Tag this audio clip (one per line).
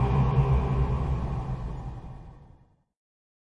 Drum
Layered
Percussion
Ambient
Roomy
Processed